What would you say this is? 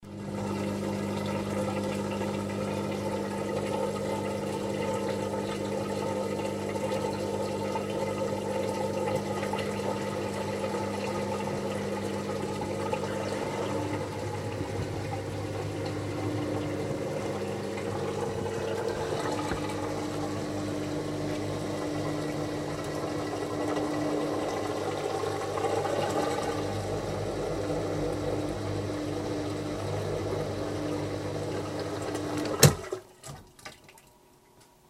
Washing Machine 9 Drain 1
bath, bathroom, domestic, drain, drip, dripping, drying, faucet, Home, kitchen, Machine, mechanical, Room, running, sink, spin, spinning, tap, wash, Washing, water